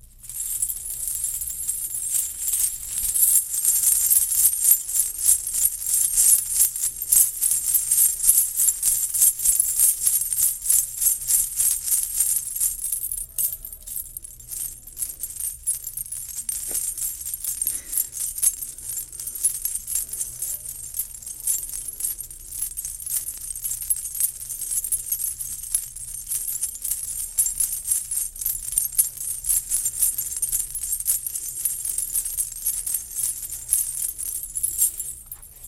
sound of falling money
sound of falling coins
som de moedas
Gravado para a disciplina de Captação e Edição de Áudio do curso Rádio, TV e Internet, Universidade Anhembi Morumbi. São Paulo-SP. Brasil.